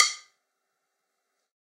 Sticks of God 020
drum, drumkit, god, real, stick